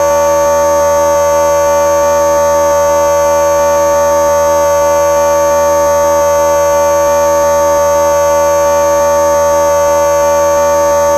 laser sustained
A simple filtered sawtooth wave that sounds like a laser beam! Updated version of "laser_sustained" to remove DC offset and normalize.
laser, noise, sawtooth, synthesized